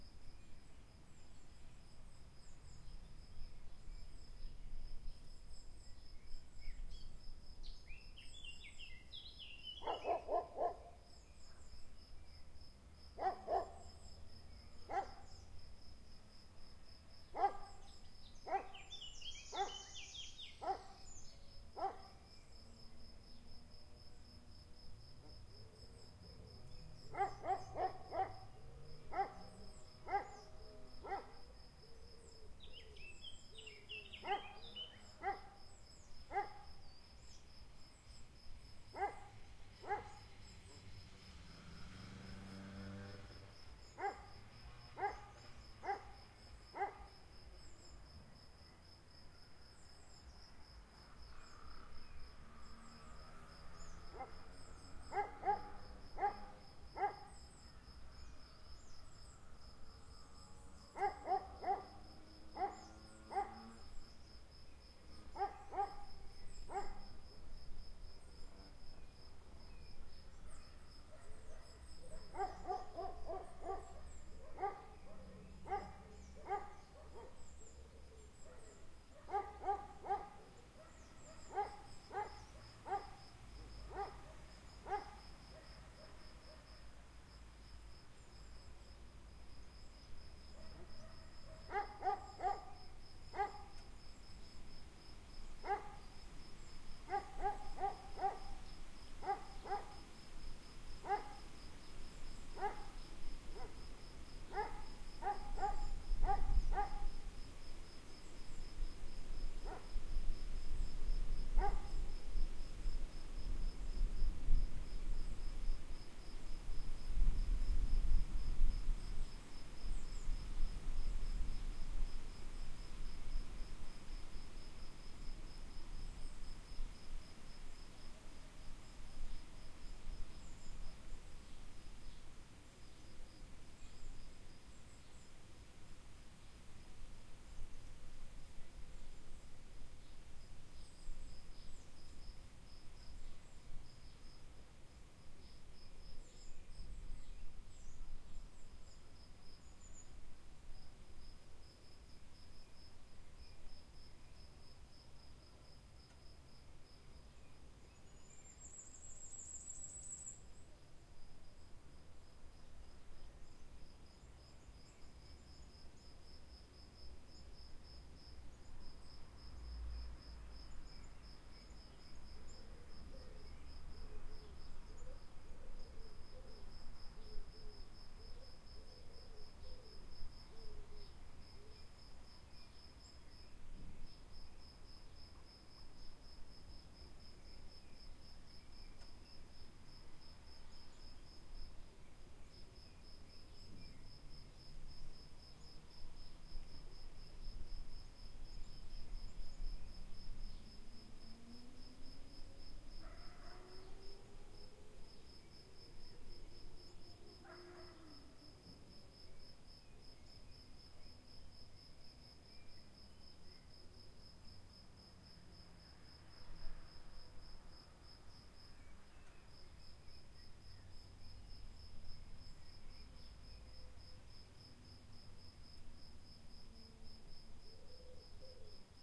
amb - cecebre 06 perro
ambience, birds, dog, forest, trees